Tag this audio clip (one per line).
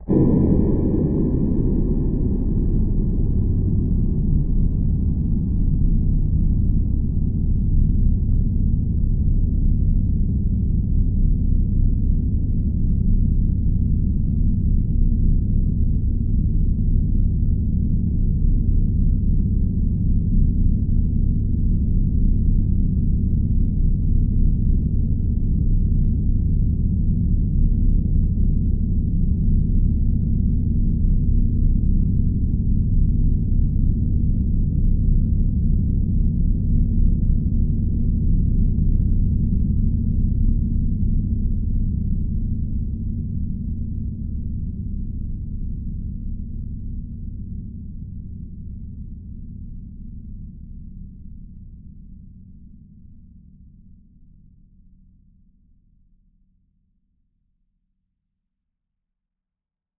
pad
organ
ambient
space
multisample
soundscape